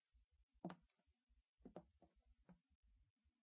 Footsteps on stage Simple with reverb
Footsteps on a wooden stage with room reverb
footsteps, wood, simple, stage, reverb